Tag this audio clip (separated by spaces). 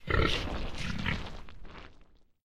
gross grunt grunting meaty oink pig